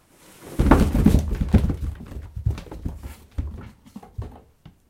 tumble downstairs a
In an attempt to capture the sound of a person falling quite painfully, we tipped a box of shoes down the stairs. Add a cheap condenser mic into the mix and this is what you get. Enjoy!
down, downstairs, fall, foley, painful, recording, shoes, stairs, tumble